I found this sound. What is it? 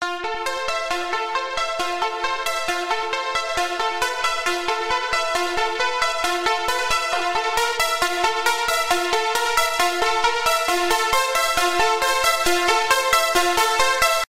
key arp loop 135
cool arp synth
arp, arpeggiated, arpeggio, synth, synthesizer